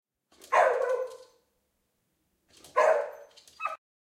05-Dog barking
Desperate barking of dog